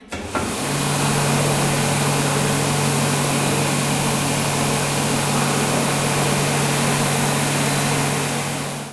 This sound is when you put on the hairdryer in the bathroom.